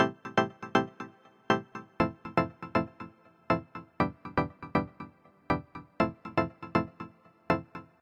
Summertime Stab
A little melody. It got kind of a fresh summer feeling in it!
120bpm, beach, chillout, delay, electronic, house, loop, melody, stab, summer, synthesizer